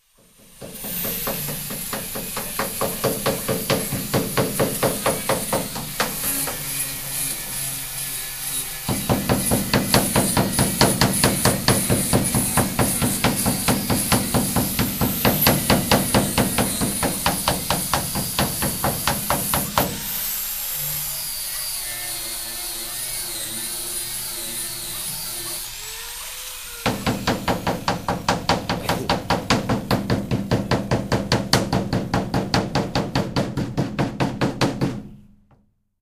Bootje bikken 2
Two friends are removing the rust from an iron boat with a hammer and a kind of sanding machine. I'm standing next to them with my Edirol R-09 recording the noise they make.